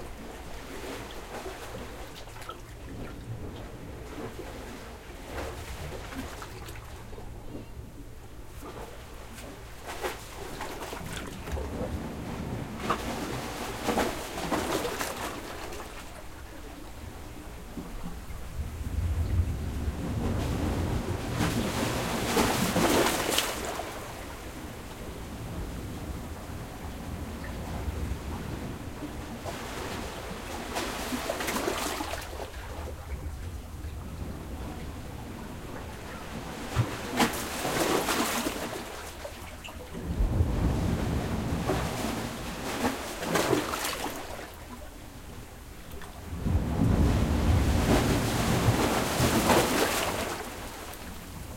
Recorded in Destin Florida
Strange sound I found when waves hit the jetty. Recorded from under the jetty itself. Just strange.
water, gurgle